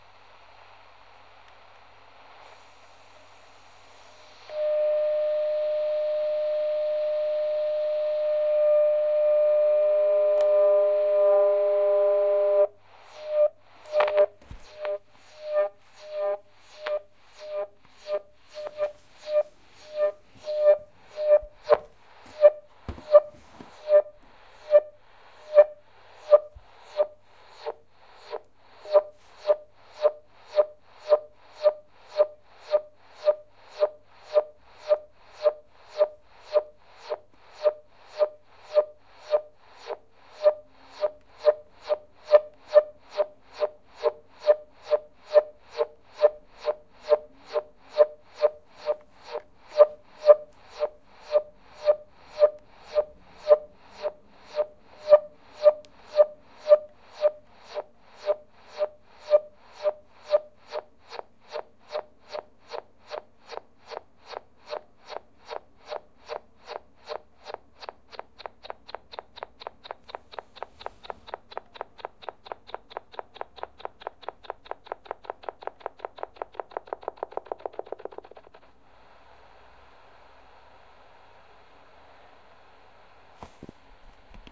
Shortwave radio static & beeps
Scrolling through various shortwave frequencies, caught a tone that slowly turned into a beep with rising tempo.
Radio used was a Grundig Yacht Boy 207 with a broken antenna, recorded on a Tascam DR-05x.